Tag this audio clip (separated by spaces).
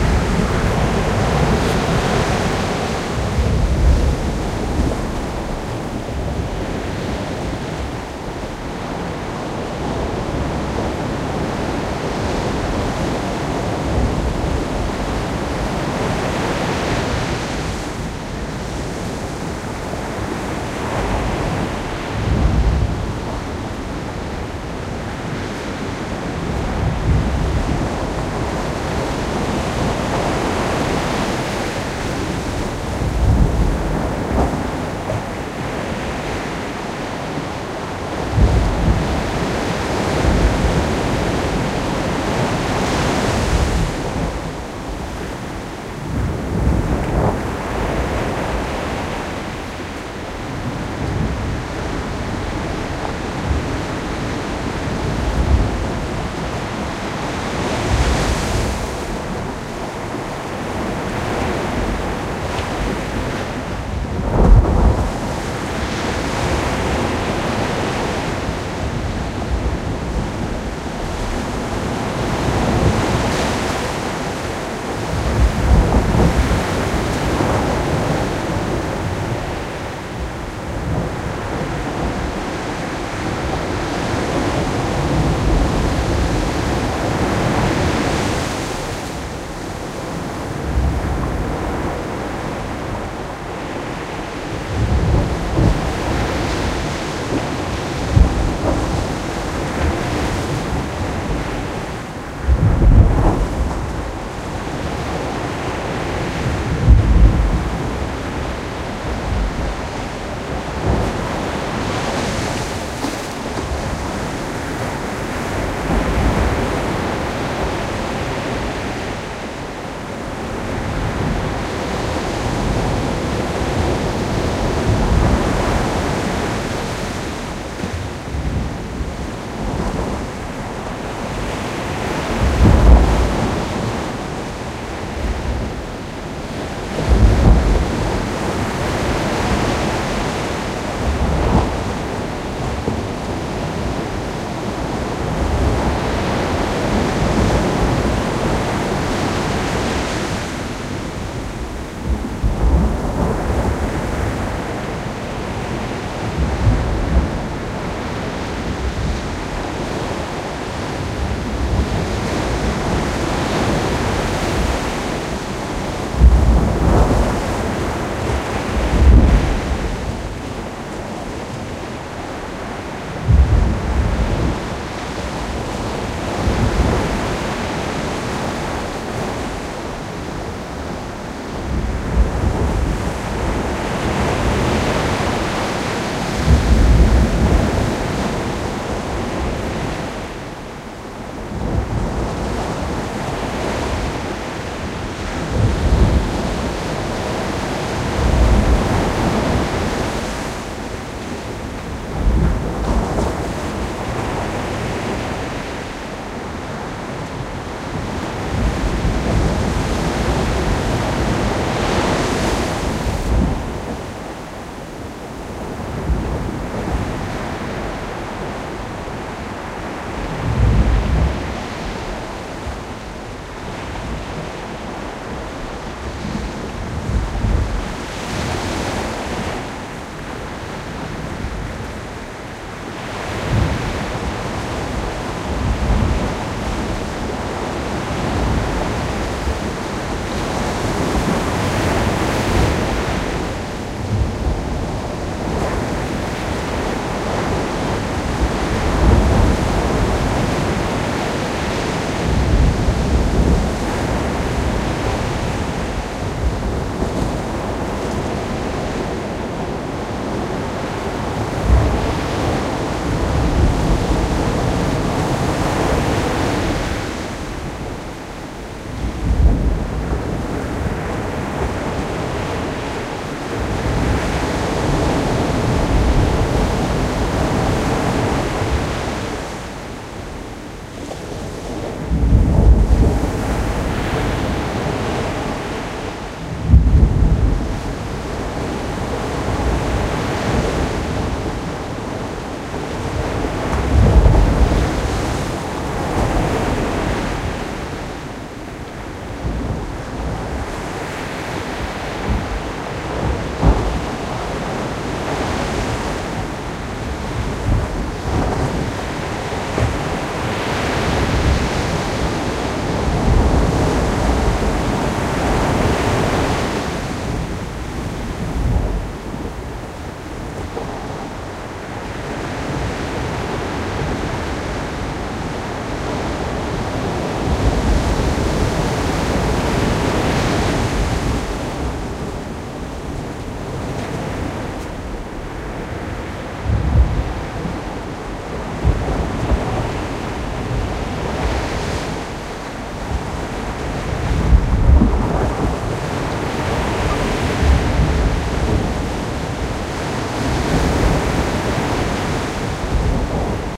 waves beach flickr rumble crushing water field-recording ocean